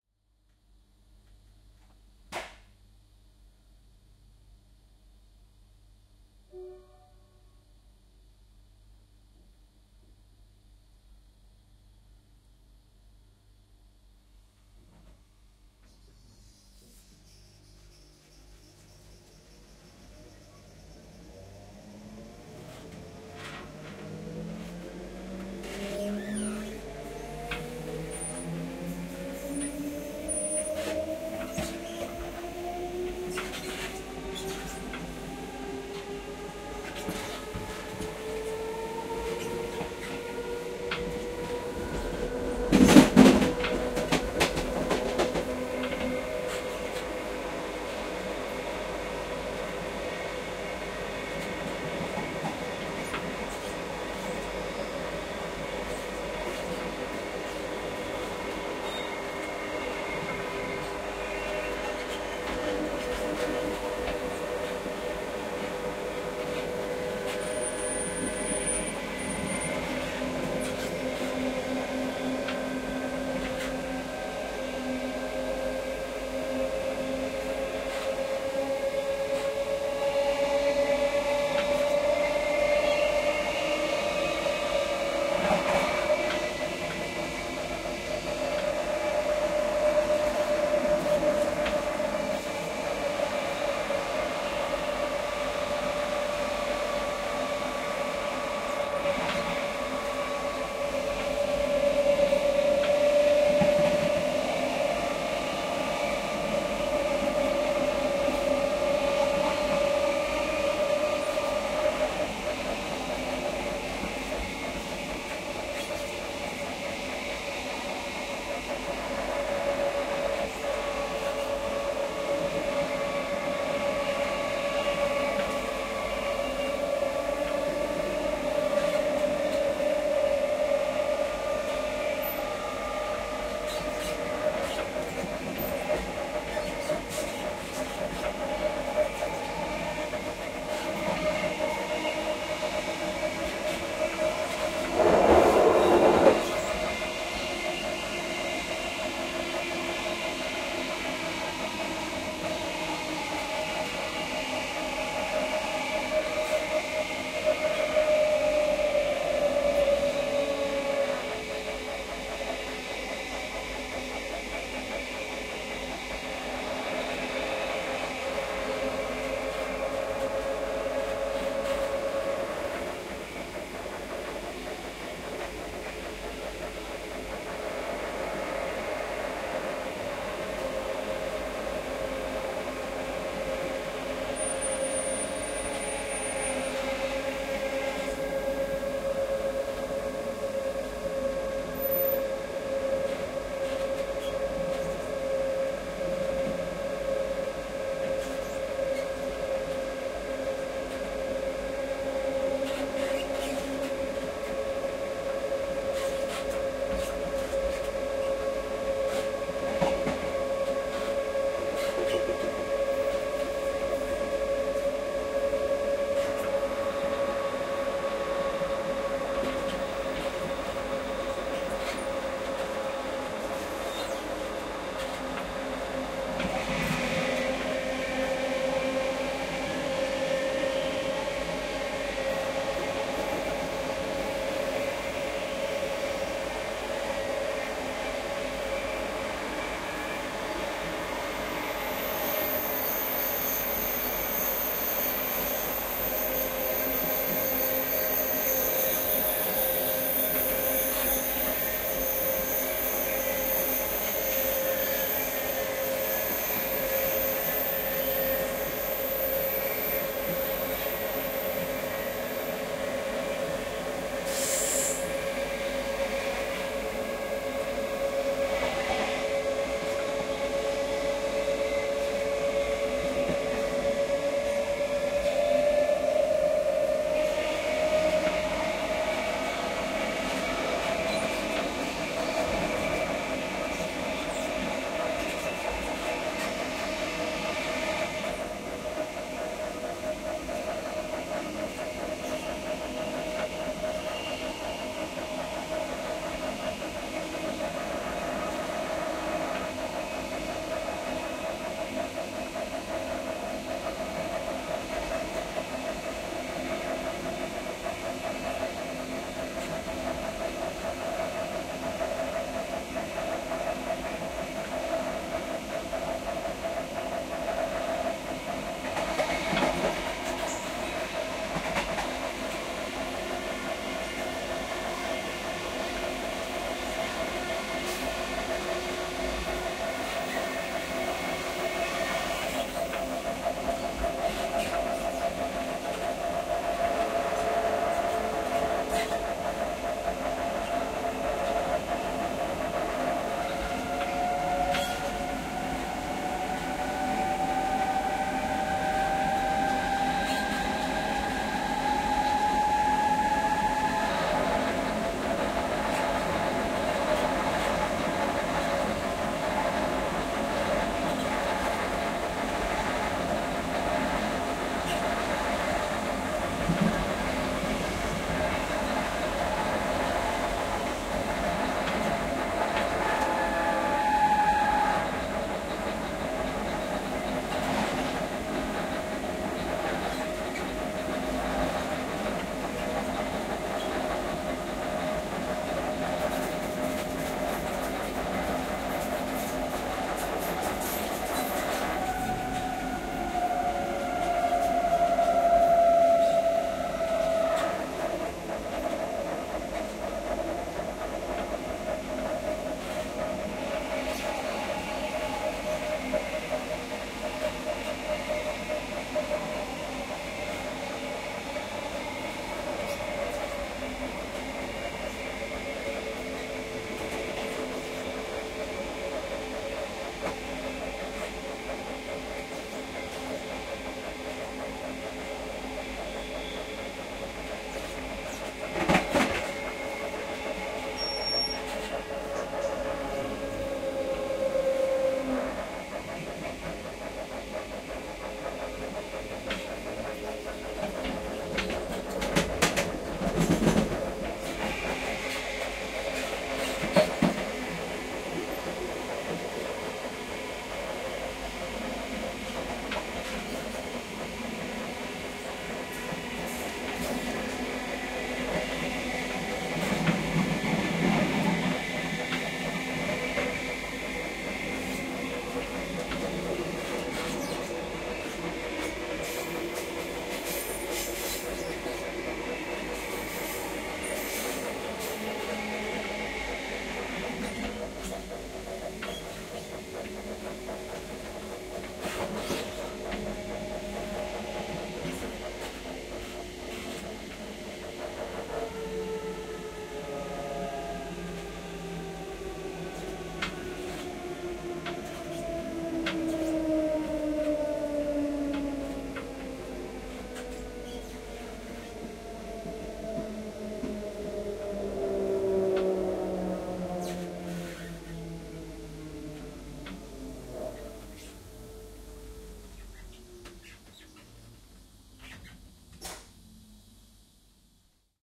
Recording of a long train trip in an electric tilt train, captured between the carriages.
Recorded using the Zoom H6 XY module.